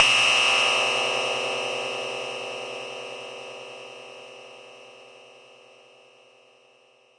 From a collection of percussive patches programmed on the Terratec Komplexer wavetable softsynth, basically a Waldorf Micro-q VST-adaptation.
cymbal, hit, komplexer, metallic, microq, single, softsynth, splash, terratec, waldorf